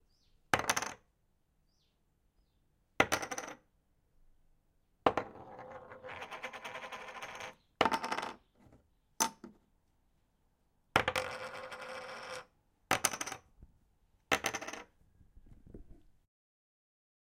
Poker Chips landing on a wooden Table
Poker chips on a wooden table: clay landing on wood, spinning, rolling. Light impact sound, small reverb, crisp. Recorded with Zoom H4n recorder on an afternoon in Centurion South Africa, and was recorded as part of a Sound Design project for College. A stack of poker chips was used